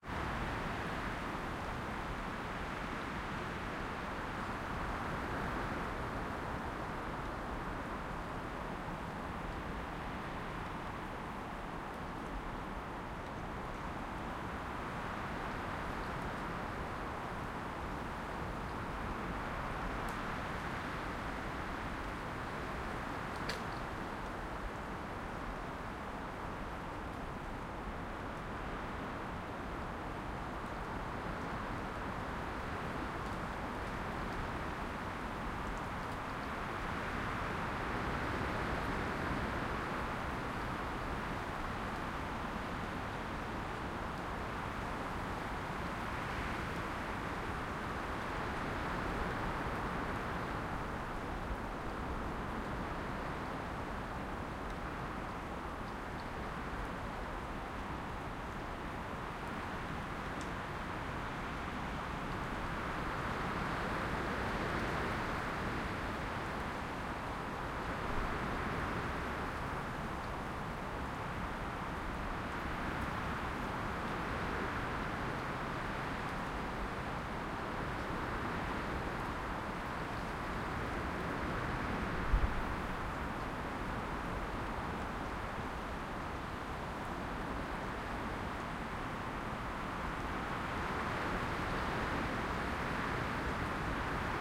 Wind pine
This is an AB stereo recording of the strong wind in the branches of a pine tree. Signal flow: Schoeps MK2 -> SQN-IVs -> Edirol-R44
edirol-r44, forest, korcula, pine, schoeps-mk2, sqn-IVs, strong-wind, wind